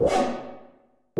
Some random FX Sounds // Dopefer A100 Modular System
a100, dopefer, fx, modular